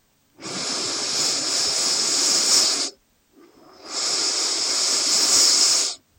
Breathing in through nose
Two inhales through nose
breath, breathing, human, long, nasal, nose, sniff, sniffing